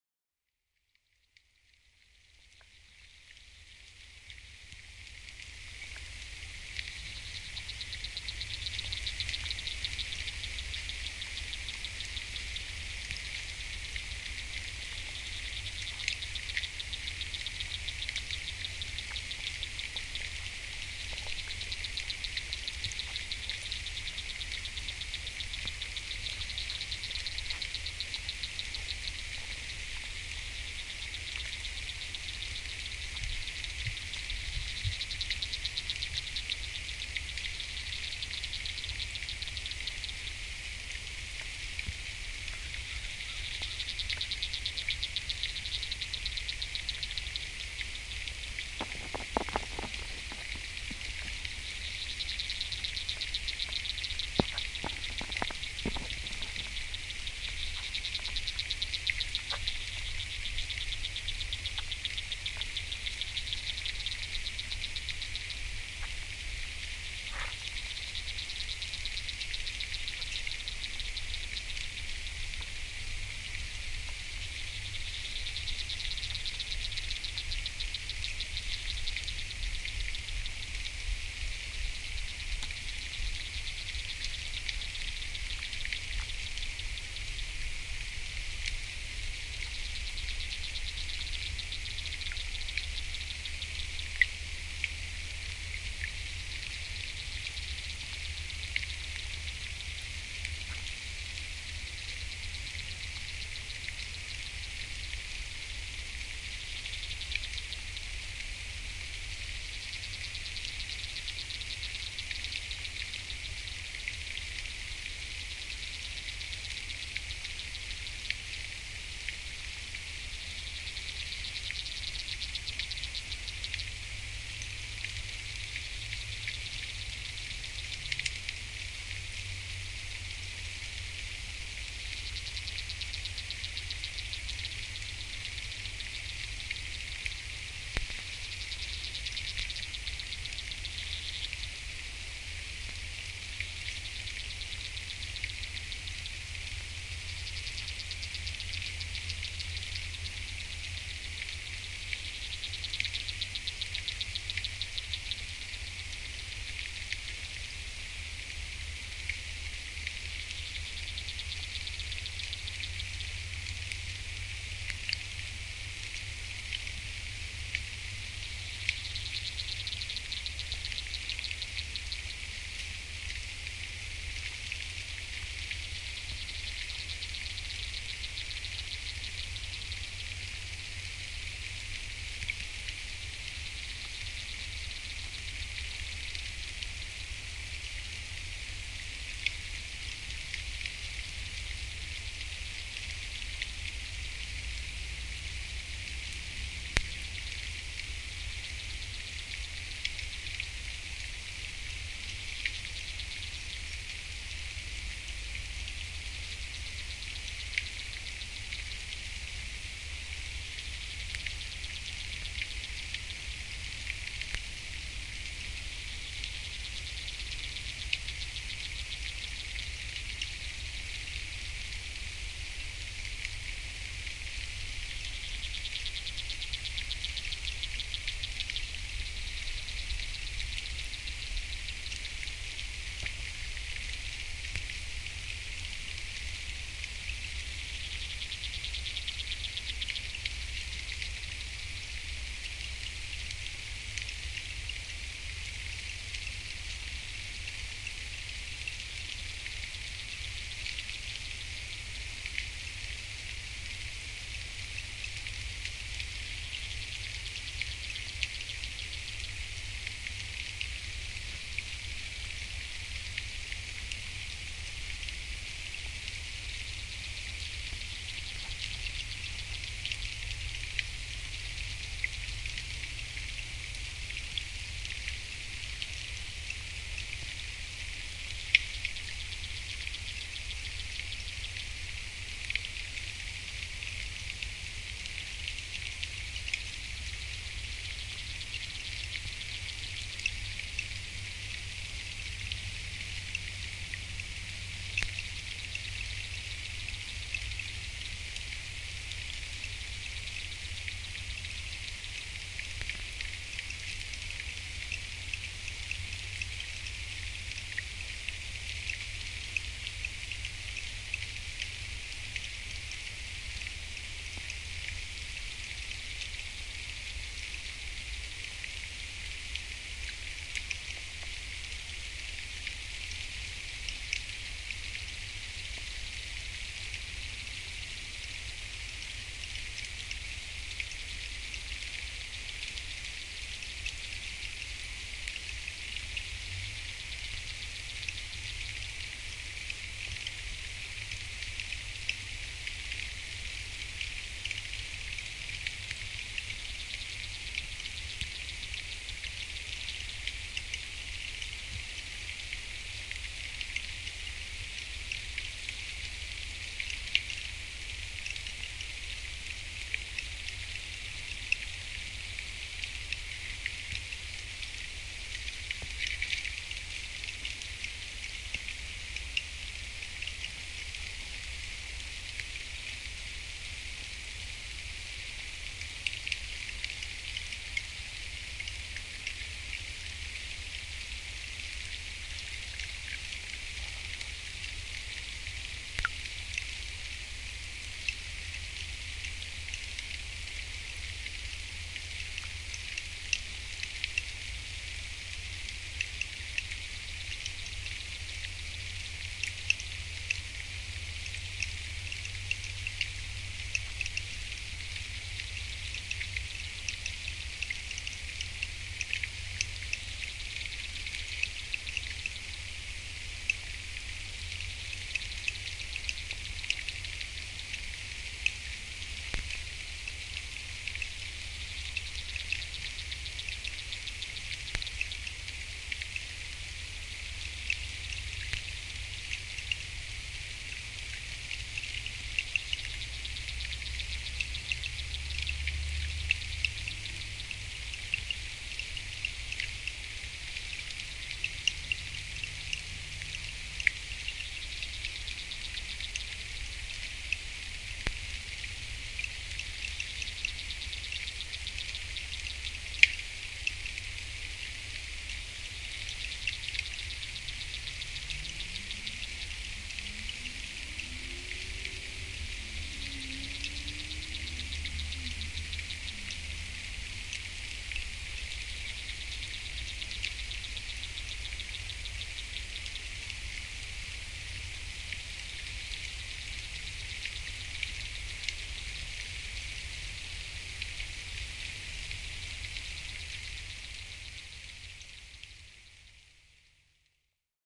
Hydrophone under North Saskatchewan River, Edmonton
hydrophone dunk underwater at Emily Murphy Park in Edmonton River Valley, Alberta, Canada in July 2010. Are those fishes I hear?
Darren Copeland
fish, hydrophone, river, water